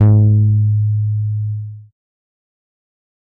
Another Psy Goa trance base sample pack. The fist sample is just a spacer.I think it starts at E1. I have never seen a set of Psy base samples on the net, thought I'd put them up. if anyone has a set of sampled bass for Psy / Goa available, please tell me, I'm still learning, so these are surly not as good quality as they could be! Have fun exploring inner space!
base, sample, electronic, trance, goa, psy, sub